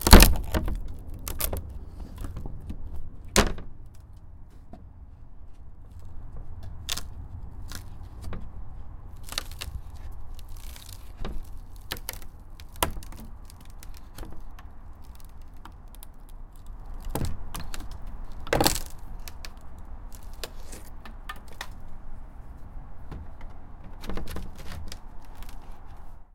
Tearing rotten wood 6a
This is me in the garden tearing away rotten wood from my fence before fitting in new wood pieces.
Recorded with a Zoom H1.
breaking, cracking, creaking, destroying, rotten, rotten-wood, snapping, squeaking, tearing, wood